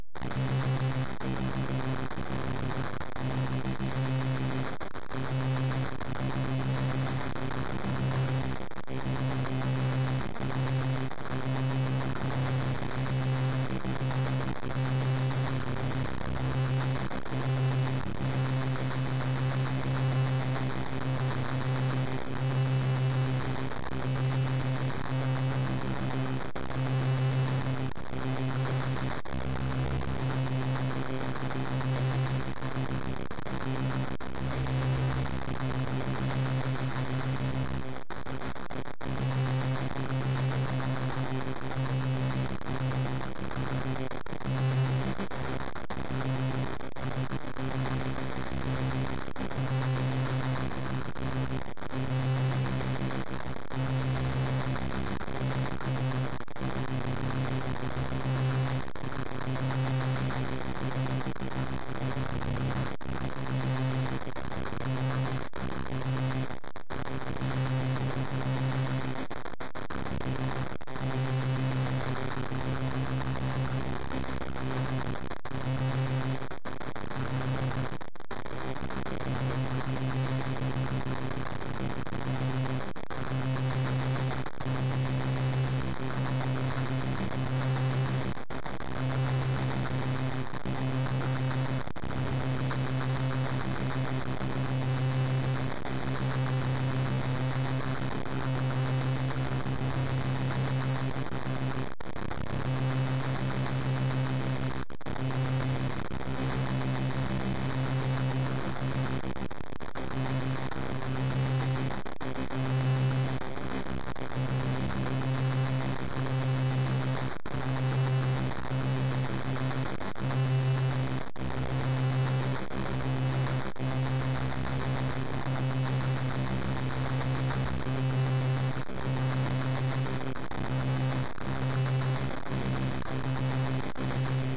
This is just noise with "Bitcrusher" and some EQ on it... Sounds like a pair of broken speakers. Like when there was too much base for the speakers to handle, and this is how they sound afterwards...
automation, command, computer, droid, electrical, interface, robotic